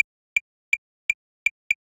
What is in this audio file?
untitled-123bpm-loop5-dry
Pieces of a track I never finished, without the beat. Atmospheric. 123 beats per minute.
123bpm, loop, minimal, tech, techno